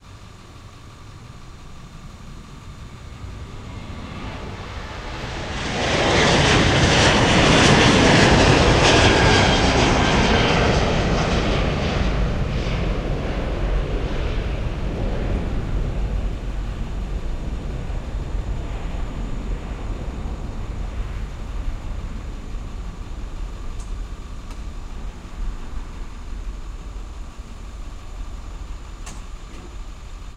Plane- it’s difficult to get good recordings of planes taking off these days. There’s always major streets or highways close by which results in a bunch of unwanted traffic noise. It’s difficult to find a good vantage point to set up because there’s hardly any public parking with unobstructed sight lines (or in my case hearing lines) to the planes. Plus, with all the extra security measures in place these days, how suspicious would I look setting up a laptop and
some cables and some other electronic equipment in the general area of the runway?
But I managed to get this recording. It’s not spectacular, but it’s OK. There’s some worker truck at the end of it which gets in the way, but doesn’t ruin it.
Nady stereo condenser microphone
Focusrite Saffire Pro24 interface
Logic 8 on a MacBook Pro
San Diego airport
airplane, field-recording, plane